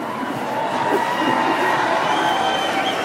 FREMONT CROWD CHEERING
A crowd cheering after a song. Some laughter. Recorded with an iPhone.
cheer, cheering, crowd, fremont, laugh, vegas